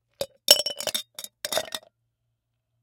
Ice Into Martini Shaker FF291
Dropping ice into martini shaker, ice hitting metal, ice cubes hitting one by one